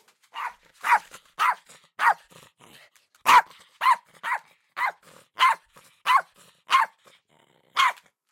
Dog barking
dog, panska, cz, czech